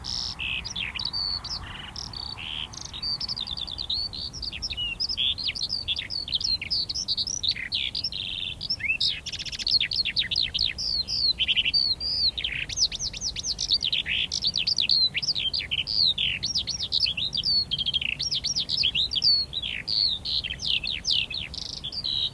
Short burst of song from a Skylark. Sennheiser MKH60 microphone, FP24 preamp into R-09HR.